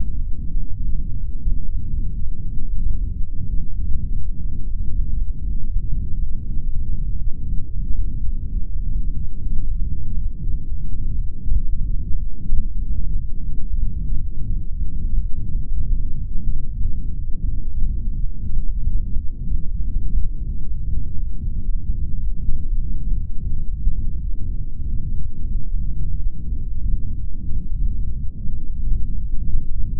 Heart Sample Audacity
A sample for a heart beat sound.
Made with Audacity, October 2015.
heart, audacity, beat